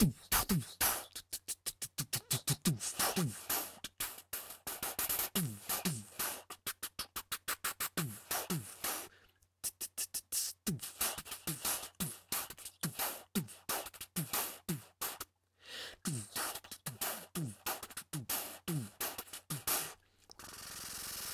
loop 180bpm
Drum and bass 16 bar loop at 180 BPM.
loop, bpm, beatbox, dare-19